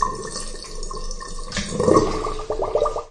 Splashing water bathroom
bath, bathroom, crude-binaural, drain, drip, home, sink, splash, water